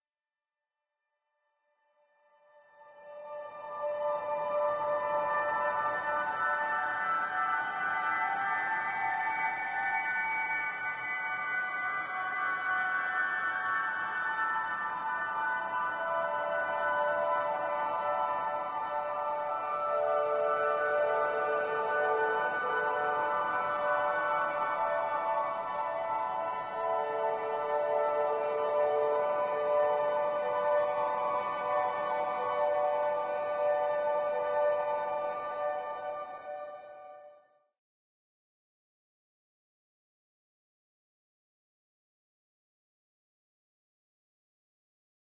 Can use without credit but I'd love to see where it's used so don't be shy to share your work with me!
Made by randomly mashing the high notes on my keyboard and having the reverb all the way up and the dry down. Simple but very effective.